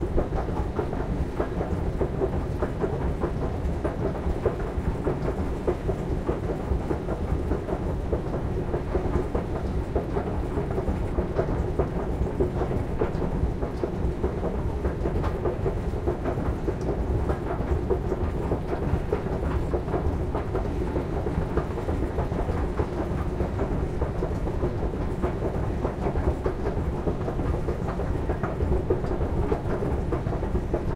Field-recording of escalator at a Dutch trainstation. Recorded at the bottom (start) of the escalator.
escalator, fieldrecording